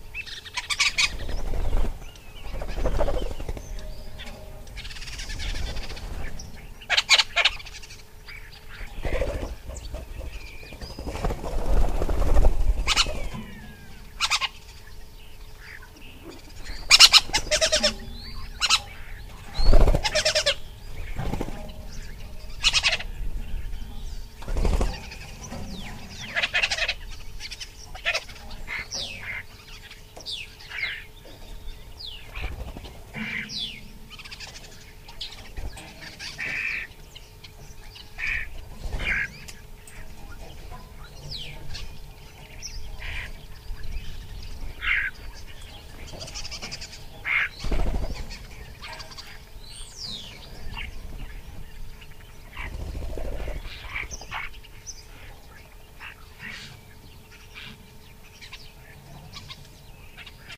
20100918.starling.fluttering.03
birds (mostly Starling) calling and fluttering near the mic. Recorded with Sennheiser ME62(K6) at Centro de Visitantes Jose Antonio Valverde, Donana, Spain